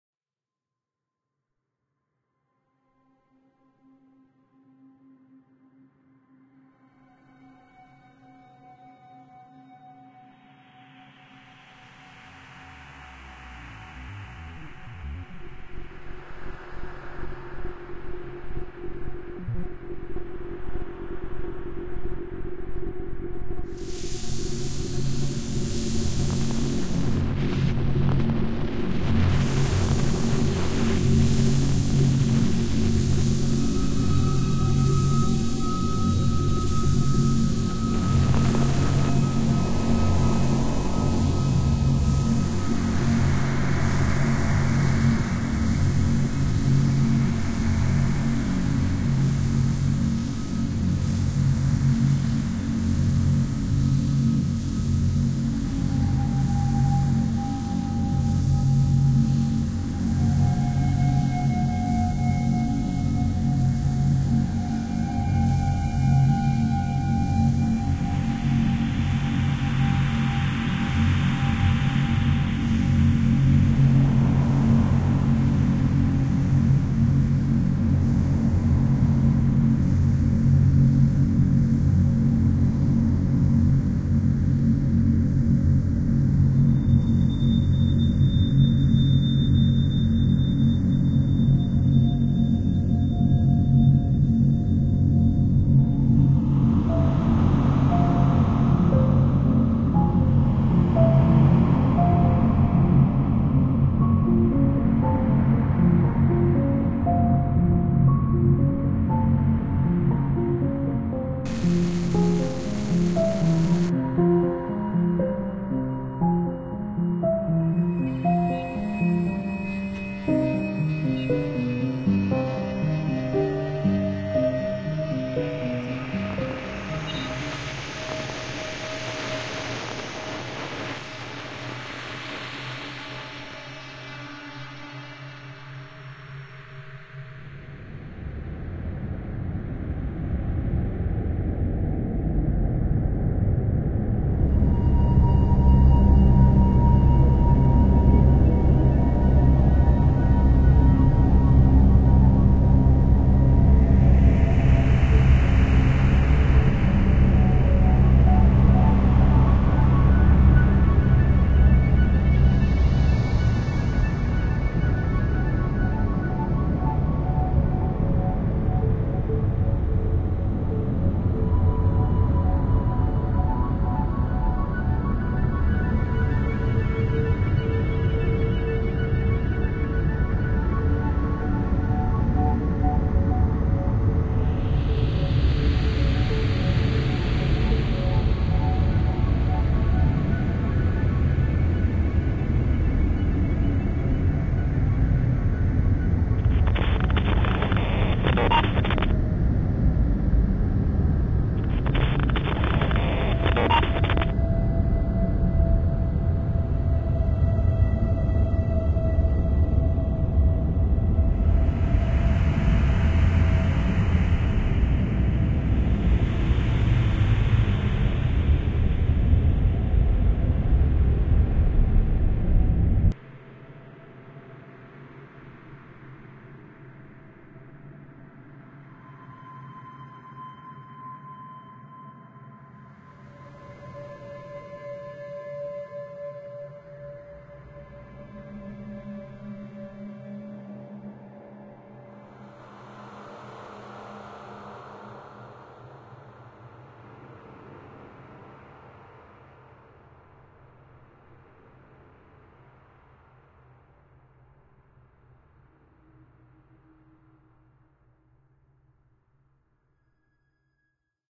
Cinematic contents electronic gadgets audio manipulation and mixing.